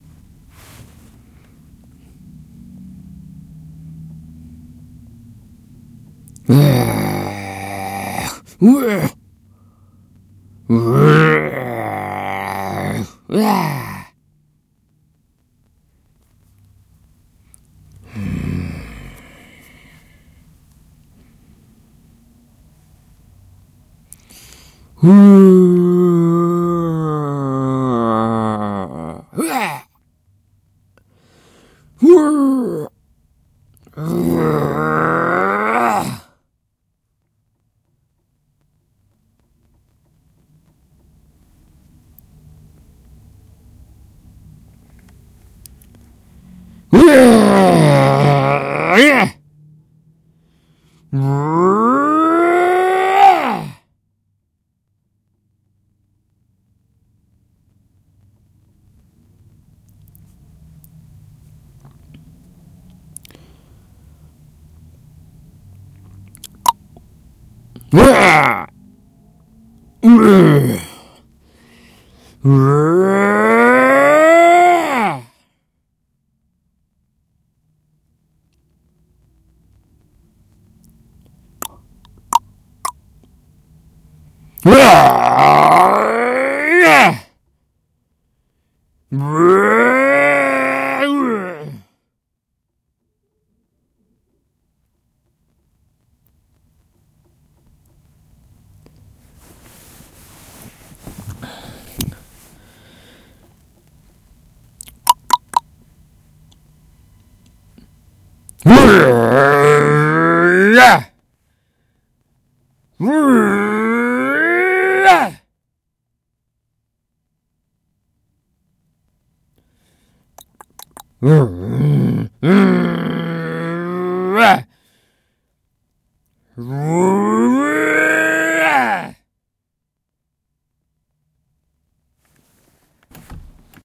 Angry emotions
Angry man emotions reactions fight
man, emotions